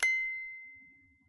clean do 1
eliasheunincks musicbox-samplepack, i just cleaned it. sounds less organic now.
clean, sample, musicbox, toy, metal, note